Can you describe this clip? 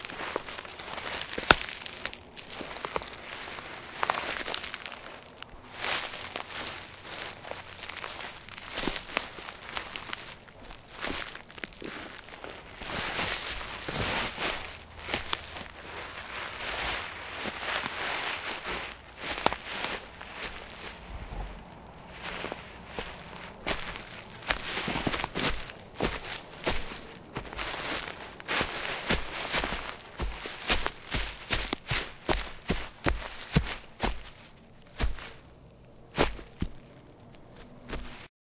08 Footsteps on leaves; close; open space
Footsteps on leaves; Close; open space; footsteps; leaves; bass walking step walk